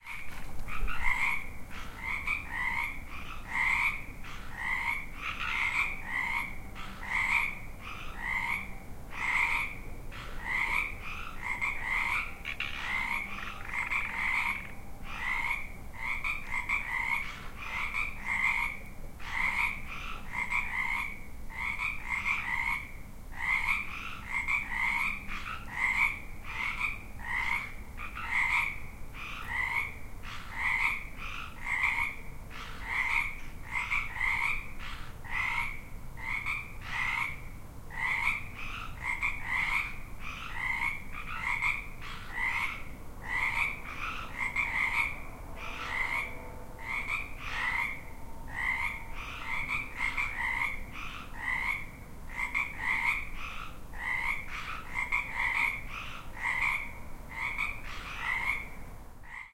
frogs, a few, minimal traffic noise
This is a recording of a handful of frogs. It was recorded on a TASCAM DR-05 field recorder. There is a little bit of traffic noise in sections.